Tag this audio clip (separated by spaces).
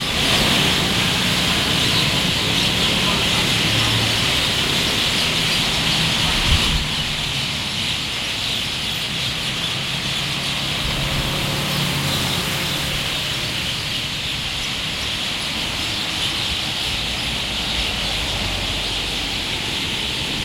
Angry Birds Cars City Traffic